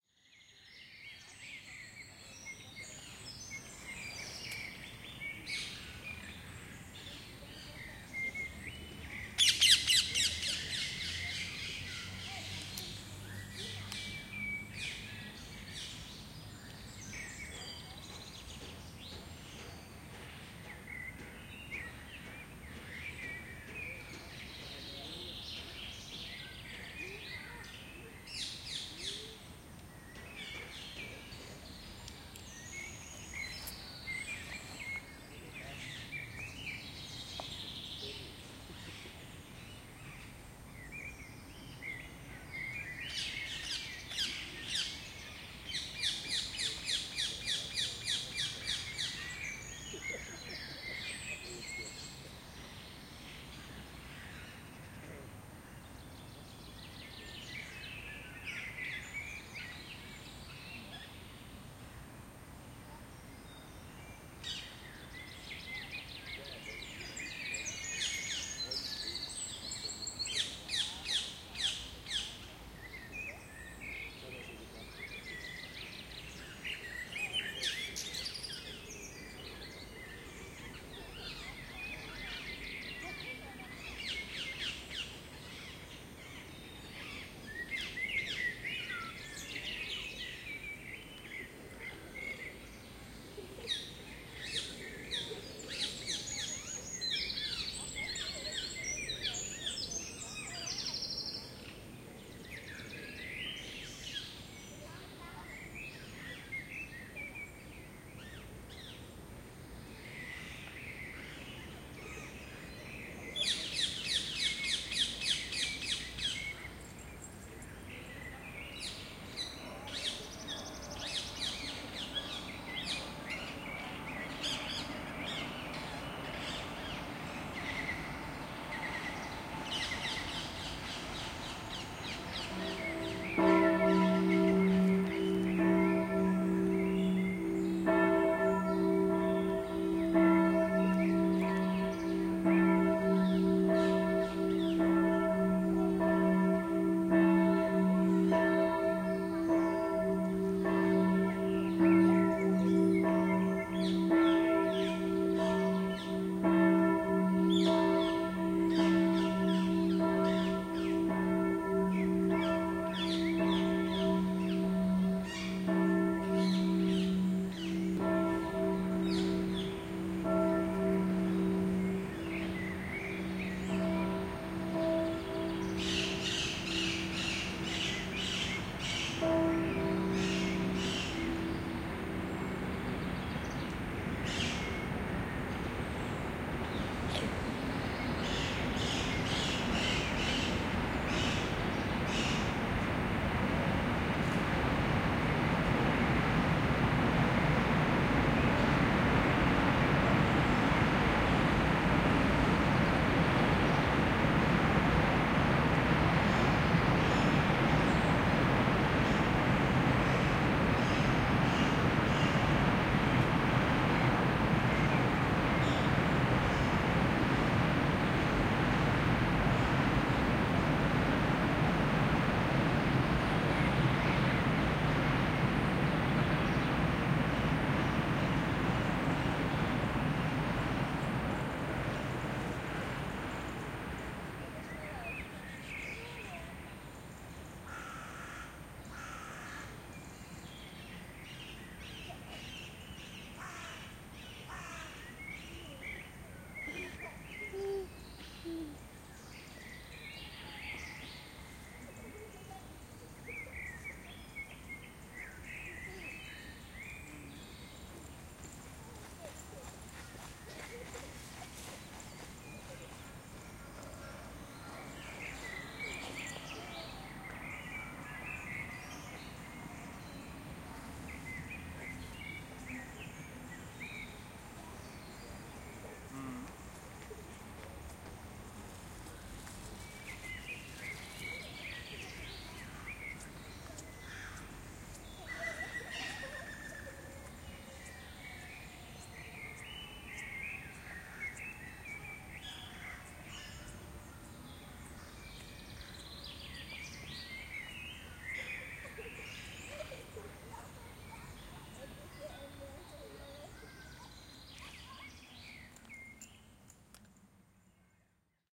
1150city park sunday
Big park with old trees, the „Stadtgarten“ in the city of Cologne on a nice sunday may morning before noon. People walking and talking, many different bird calls and songs like blackbird, wren, green woodpecker a.o., loud calls of Ring-necked Parakeet (Psittacula krameri, originated from Asia or Africa, free-living in warmer regions of Germany since the early sixties), church bells, trains passing by. Sony ECM-MS907, Marantz PMD671.
cologne, parakeet, park